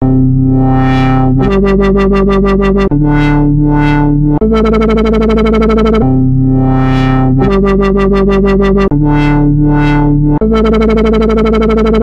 Wobble drop
beautiful, Dub, dubstep, effect, effects, electronic, electronica, LFO, live, music, one, pretty, sample, samples, shot, stab, stabs, sub, substep, wobble, Wobbles